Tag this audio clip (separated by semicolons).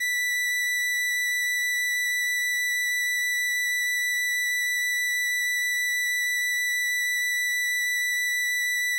combo-organ; vibrato; string-emulation; analogue; vintage; 70s; electronic-organ; electric-organ; sample; analog; strings; transistor-organ; raw